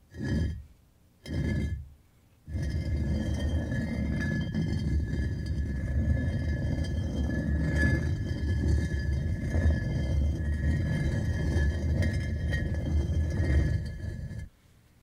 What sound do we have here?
concrete blocks moving2
Concrete blocks moved on top of one another. Sounds like a stone door moved. Use this sound to enter the secret chamber of your pyramid.
Recorded with AKG condenser microphone to M-Audio Delta AP soundcard
stone, concrete-block, effect, grinding